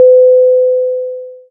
Elevator Ping 02
Elevator Ping
If you enjoyed the sound, please STAR, COMMENT, SPREAD THE WORD!🗣 It really helps!